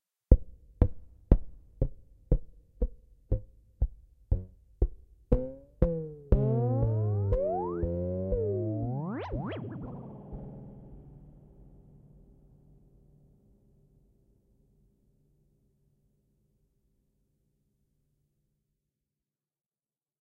sound of a imaginary bouncing ball recreated by the use of a recorded noise, a stereo delay, a reverb...
ball, bouncing, effect, fx, suond